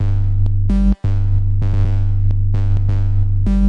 808 Bass 130 BPM
A Bass loop created using NI Massive and 3rd party effects